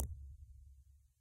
just a tom